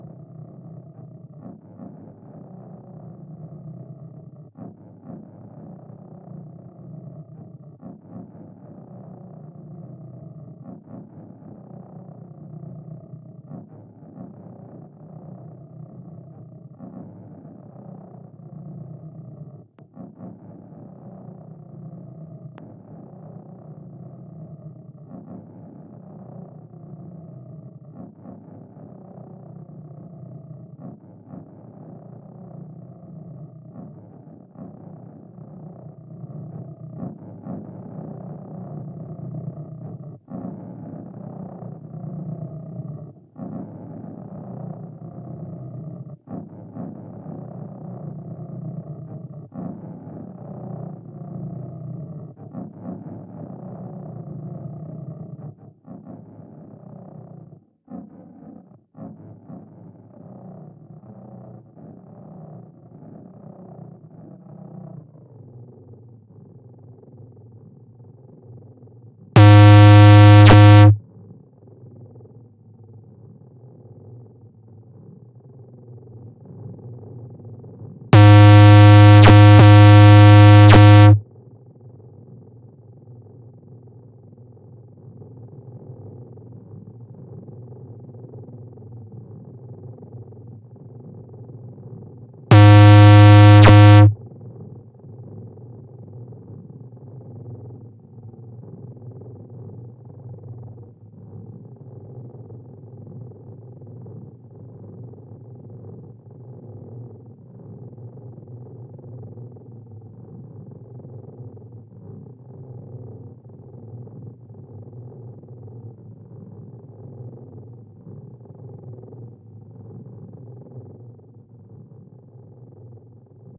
Growls animals digital feedback failures 01
Mutated growls with Pro Tools TDM 6.4 plug-ins
Growls, Textures, Sickly, Digital-error, Madness, Insanity, Sick, Failures, Fail